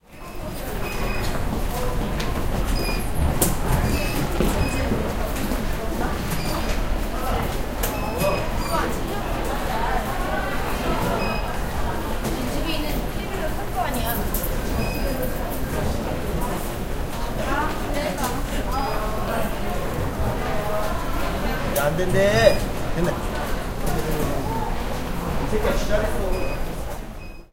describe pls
0023 Metro ticket
Metro ticket machine beep entrance exit. People talk
20120112